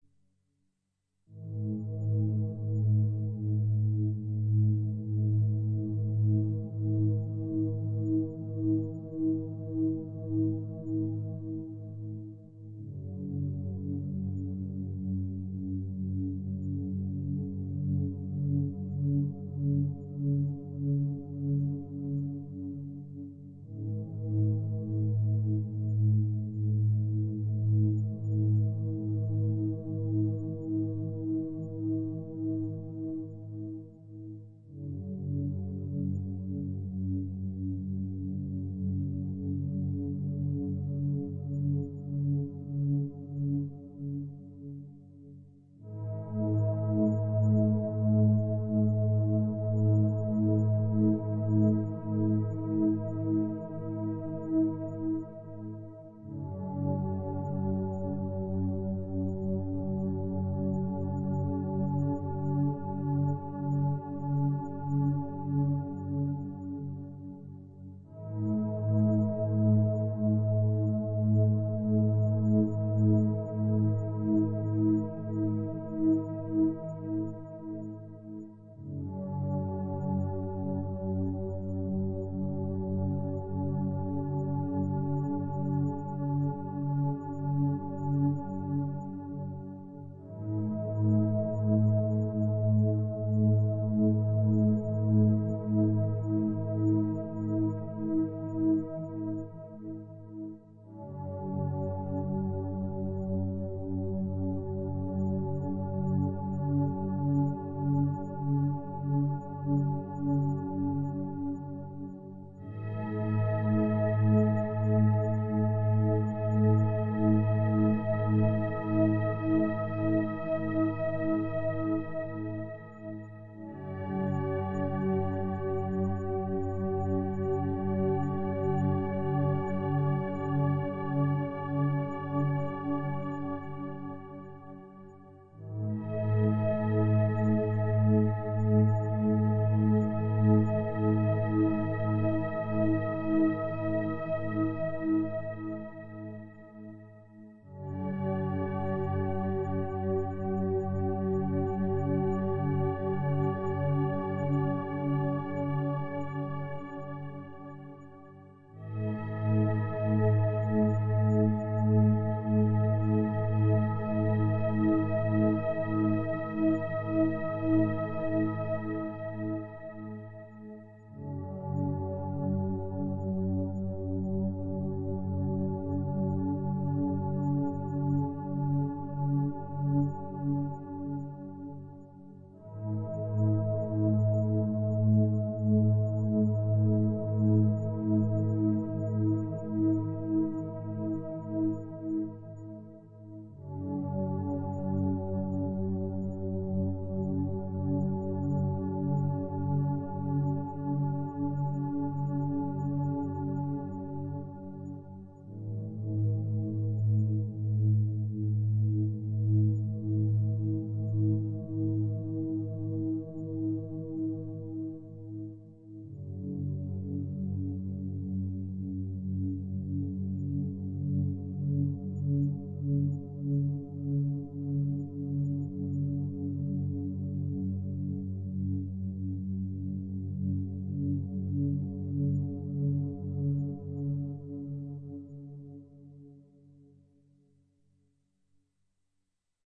relaxation music #23
Relaxation Music for multiple purposes created by using a synthesizer and recorded with Magix studio.
Like it?
atmosphere, ambience, relaxation, synth